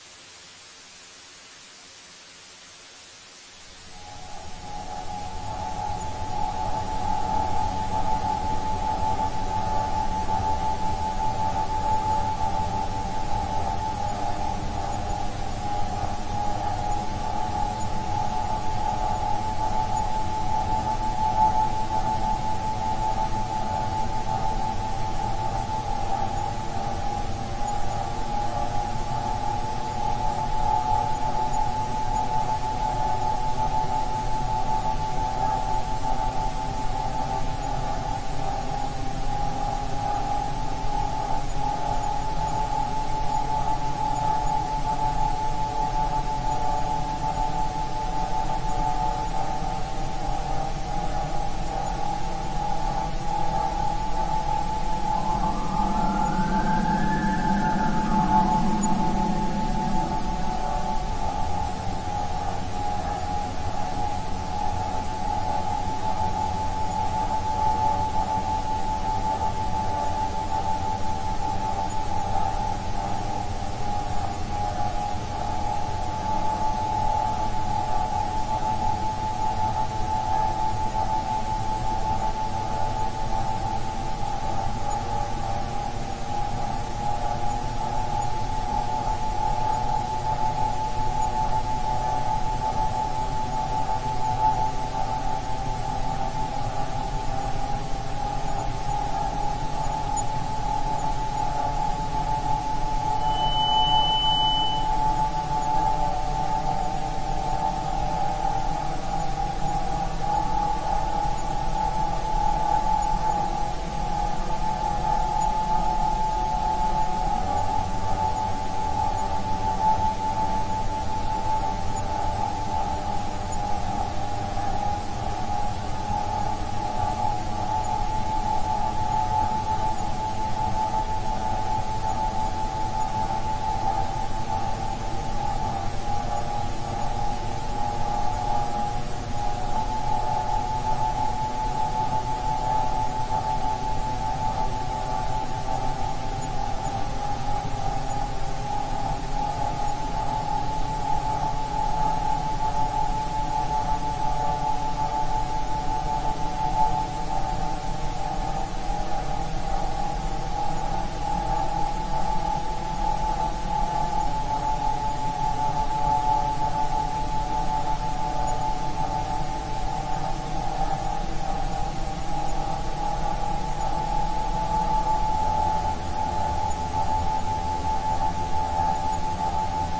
Nighttime recording of my AC (Scary)
I decided to go out for the night so I thought it would be a good idea to have my sound recorder going for around 3 minutes. My AC turned on a little after. I caught a few things like fire truck sirens, and some sort of ear ringing sound. Not sure where that came from but it happened. If you have any ideas or theories on what that sound is, don't forget to comment! Thank you!
house, air-conditioning, Air-conditioner, air